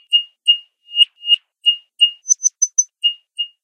It is a sound of rattling glass, which I repeated twice (effect), the second copy I reversed (effect: reverse direction). Then from what I got, I started to copy-paste. Then I selected a part to which I added an effect (shift), then I selected the whole, and apply the effect (vocodor) by adding a fade out.